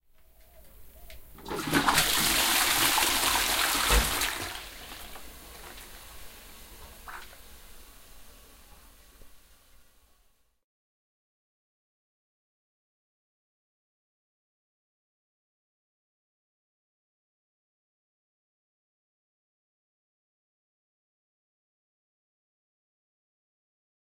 Water Bath
Bath, flowing, running, splash, water